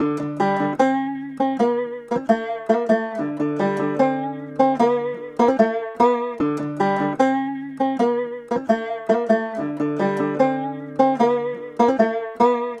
Eastern Banjo 20 - 150bpm - D - New Nation
loop, string